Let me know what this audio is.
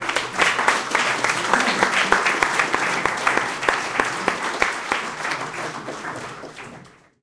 Applause and Murmur
People applauding at a small gathering.
Recorded with Zoom H2. Edited with Audacity.
end, crowd, cheer, conference, applause